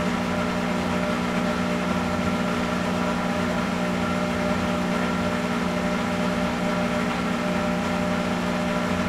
washing machine wash3 cycle3
During the wash cycle.
industrial, cycle, water, washing, wash